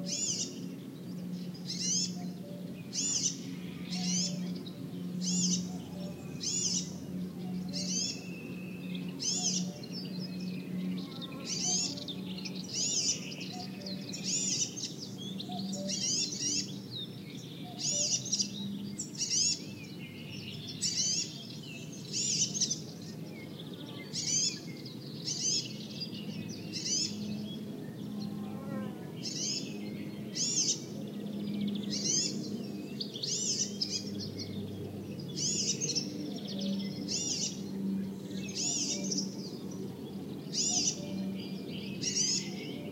close tweets from a bird with others (serin, kite, cuckoo) singing in BG. A distant plane and some insects. Sennheiser ME62 > iRiver H120 / un pajaro piando y otros cantando al fondo
spring, tweets, birds, nature